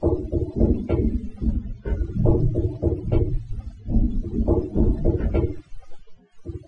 Created from this sound:
By trimming a suitable section using only Audacity. It loops seamlessly at 143.66 BMP.
144BPM, perc, percussion-loop, seamless-loop, rhythm, experimental, loop, rhythmic, dare-29, knocking, abstract, percussion, Audacity, dare-35